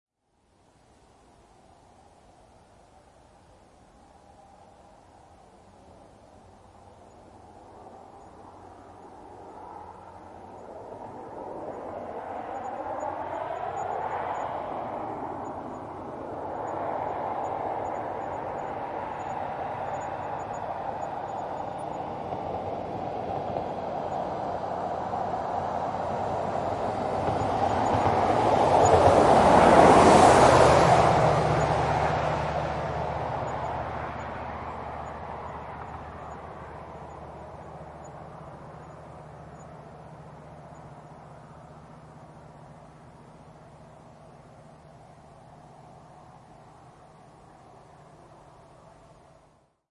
0016 Car driveby RL
Car driveby on highway right to left.
car, driveby